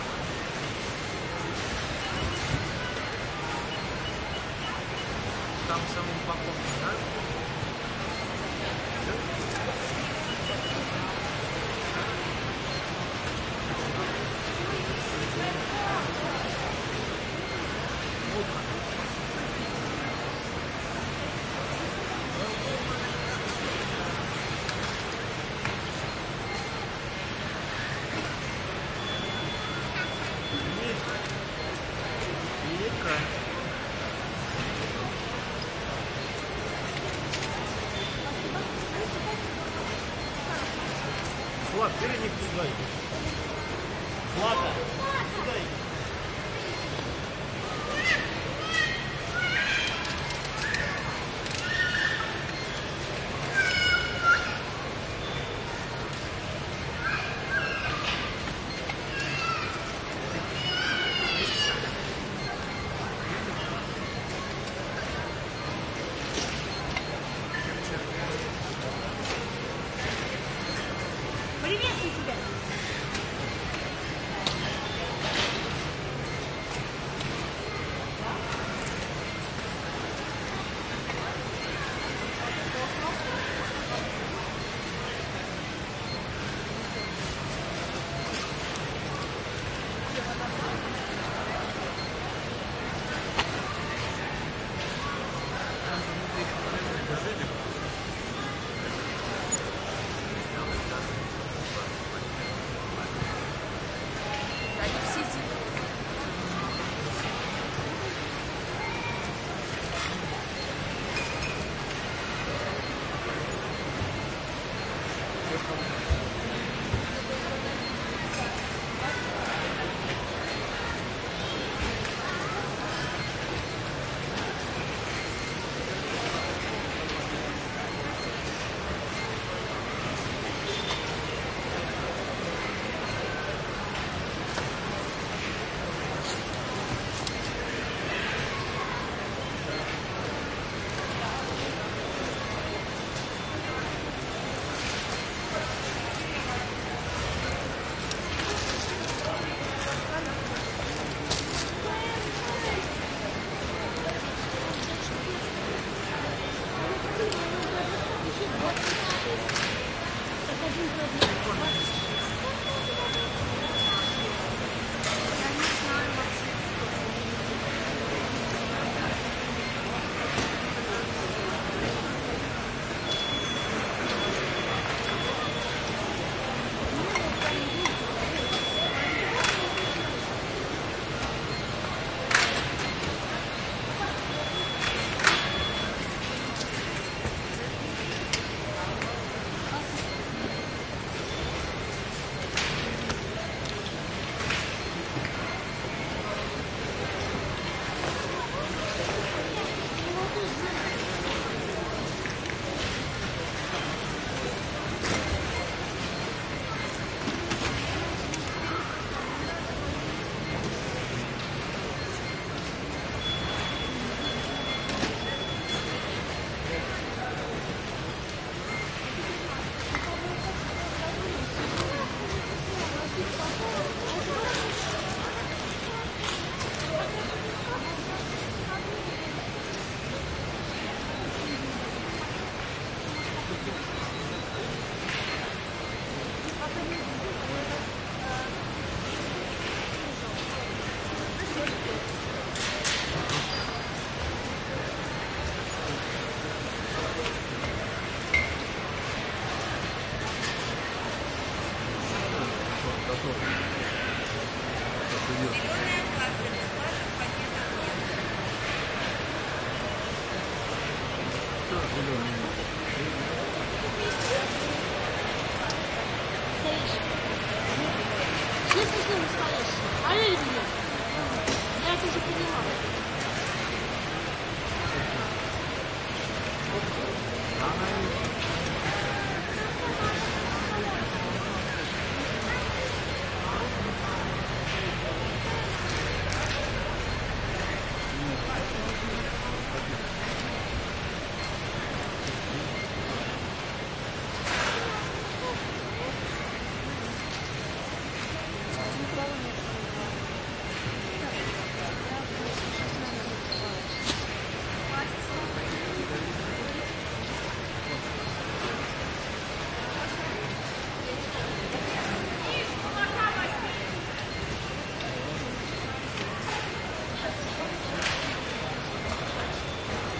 russian supermarket (auchan) near registers
Russian supermarket (auchan) near the registers line. Large crowd. Russian talkings.
Recorded with pair of Naiant XX and Tascam DR-100 MKII in pseudo-binaural array
register, store